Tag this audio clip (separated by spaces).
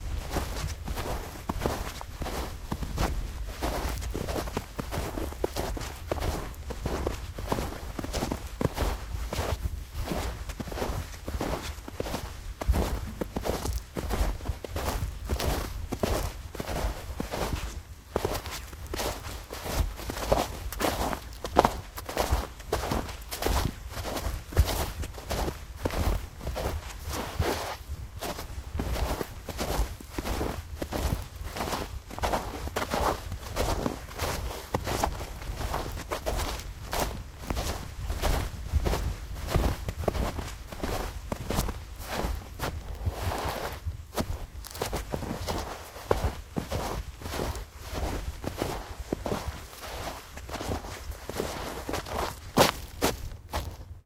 boot boots cold feet foot footstep footsteps noisy shoe shoes snow step steps walk walking winter